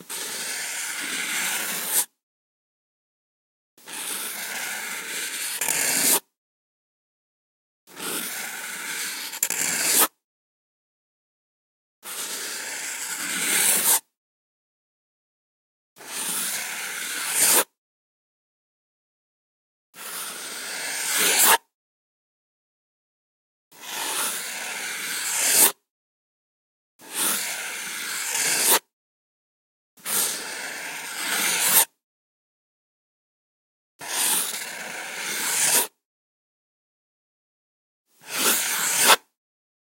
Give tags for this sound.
marker
pen
stift
draw
drawing
pencil

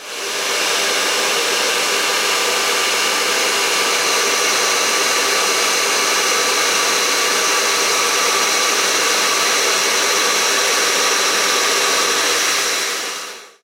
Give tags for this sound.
Electric
Robot

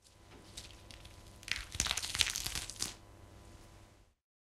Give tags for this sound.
bones
breaking
cauliflower
foley
horror
pulse
vegetable

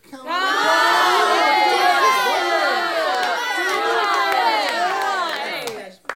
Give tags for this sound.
audience
crowd
group
studio
theater
theatre

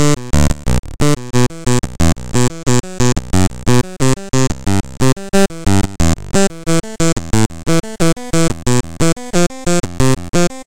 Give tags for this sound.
pulse
weird

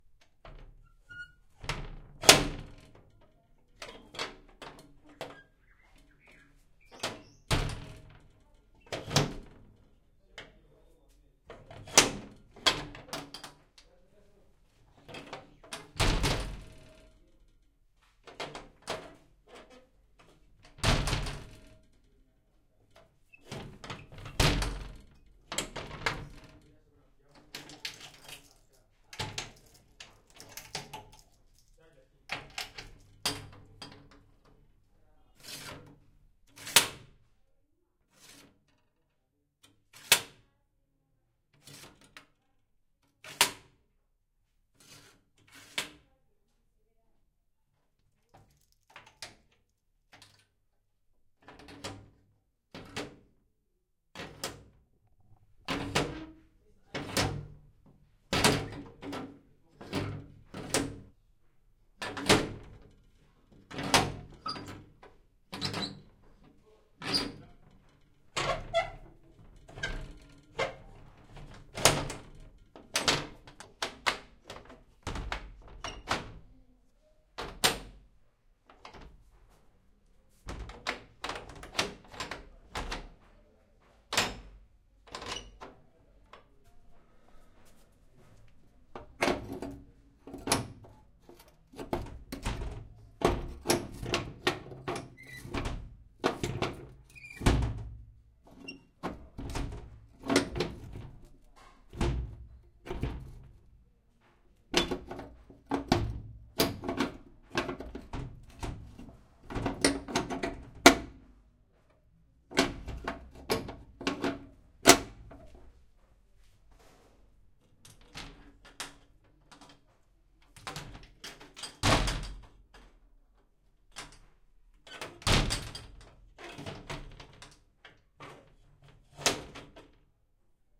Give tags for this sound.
close
door
hatch
heavy
metal
open
rattle
slide
squeak
unlatch

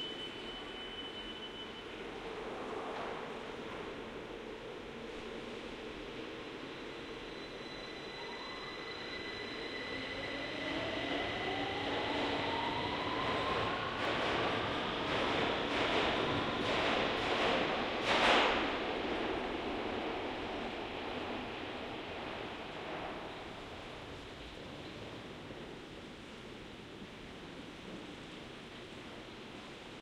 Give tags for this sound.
tubestation
binaural
qmul

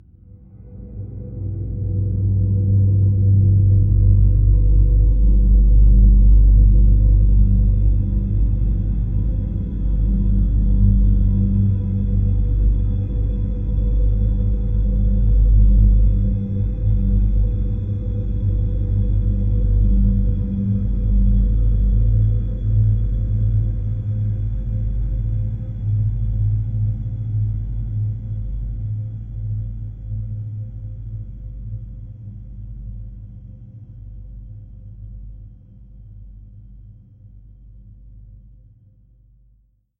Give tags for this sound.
soundscape
multisample
cloudy
pad
cinimatic
space

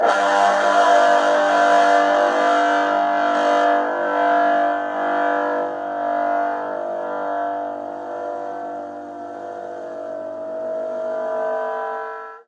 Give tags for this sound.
distortion
overdrive
power-chord
fuzz
electric
guitar
chords

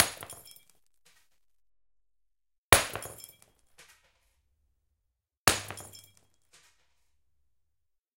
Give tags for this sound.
breaking
dropping
falling
floor
glass
glasses
ortf
xy

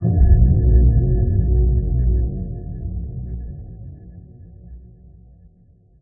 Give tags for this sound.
deep
into

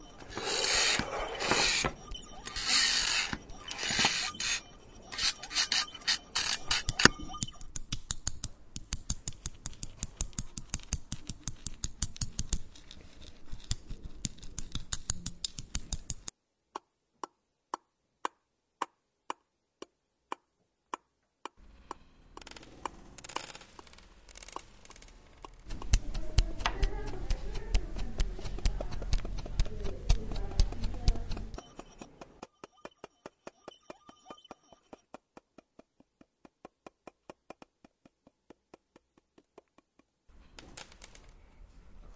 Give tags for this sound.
aes
Barcelona
Soundscape

